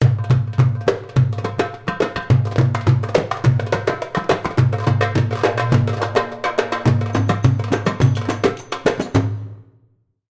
diffrent type of Percussion instrument of darbouka :
ayyoub/darij/fellahi/malfuf/masmudi-kibir/masmudi-sagir/rumba-.../Churchuna/Dabkkah/Daza/
insomnia, insterment, relaxation, arabic, meditative